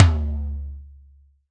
SRBM TOM 002
Drum kit tom-toms sampled and processed. Source was captured with Audio Technica ATM250 through Millennia Media HV-3D preamp and Drawmer compression.
drum, drums, kit, real, sample, tom, toms